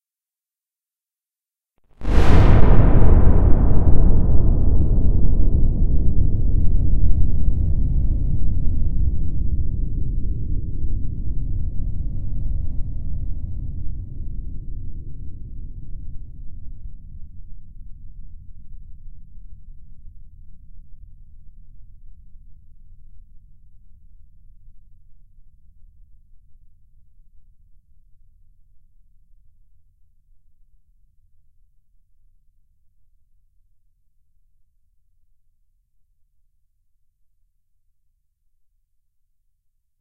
Rocketship Taking Off
Made with Audacity.
rocketship, spaceship